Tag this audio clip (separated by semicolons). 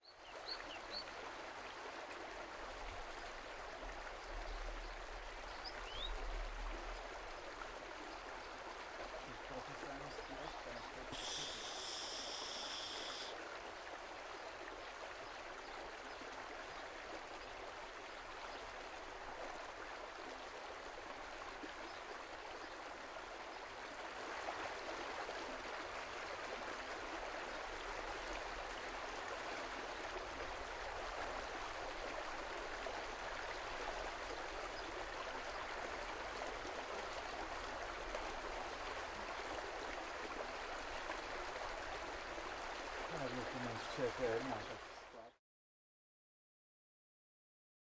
water; stream; waterfall; river